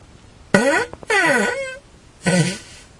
fart poot gas flatulence flatulation explosion noise weird
explosion, fart, flatulation, flatulence, gas, noise, poot, weird